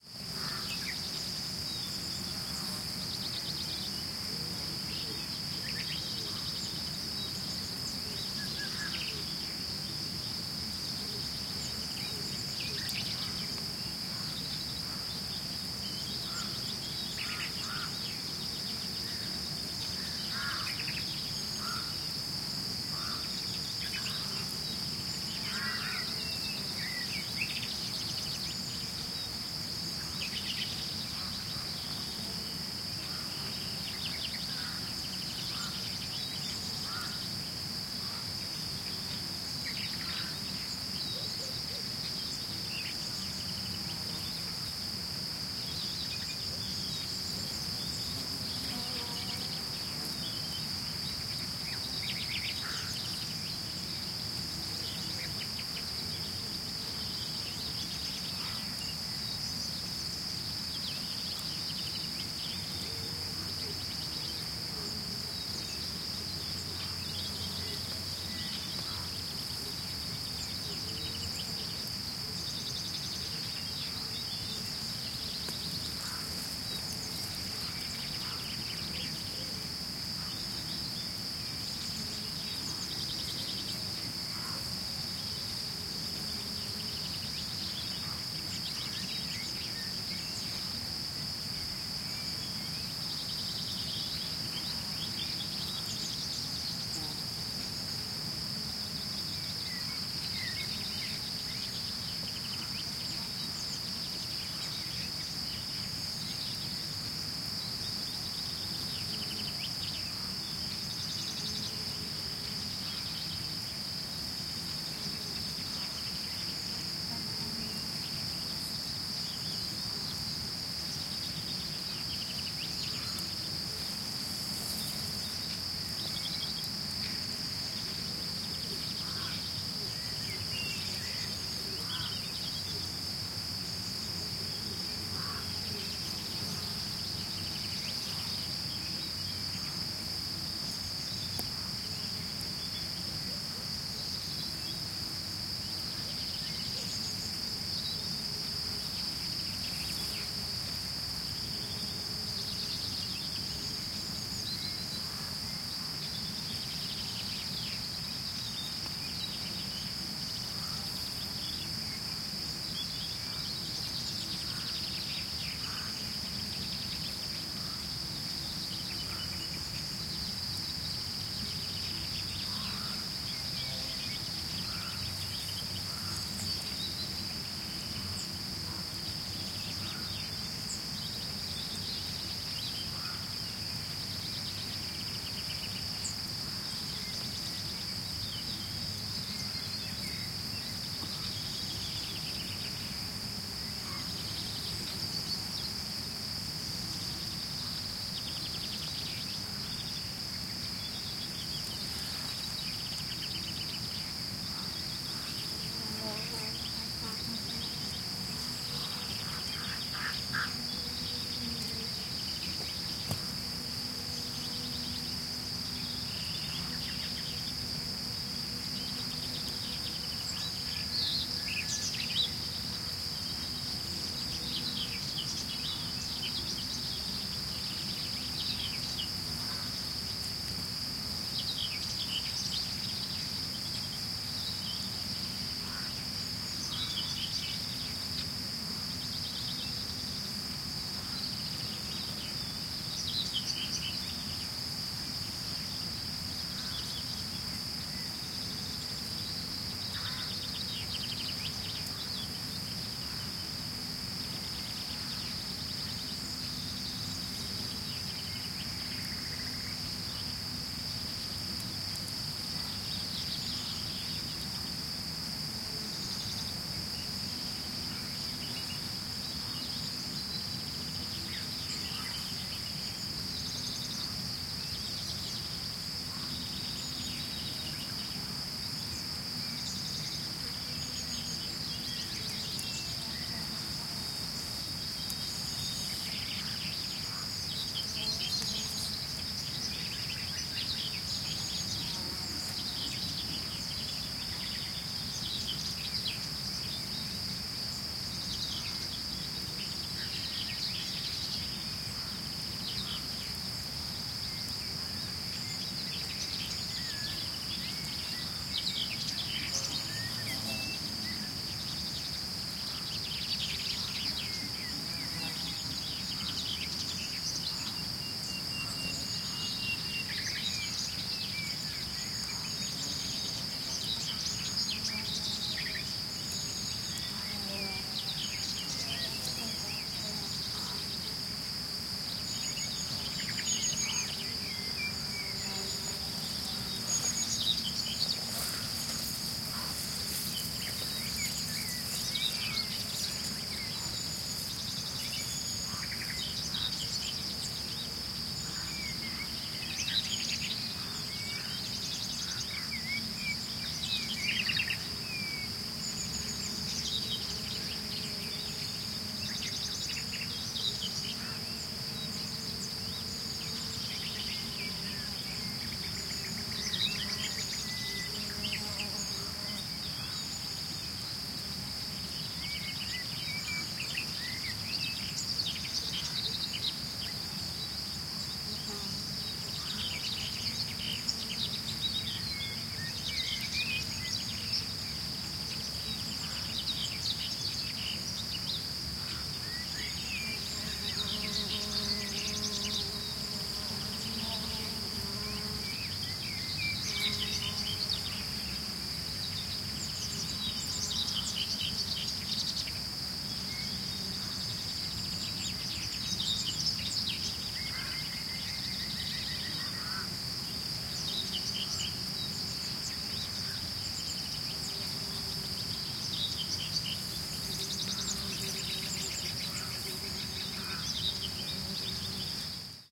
20210625.summer.twilight
Peaceful nature ambiance in a forested, rural area in N Spain, with many birds calling, crickets chirping, and some occasional bee buzzing. Recorded near Perapertú (Palencia Province, N Spain) using a matched Stereo Pair (Clippy XLR, by FEL Communications Ltd) into Sound Devices Mixpre-3.